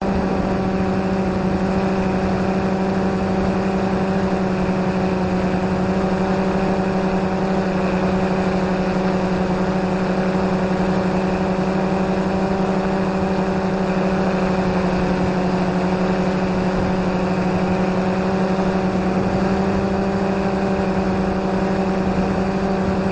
Steady flight of Phantom with little wind noise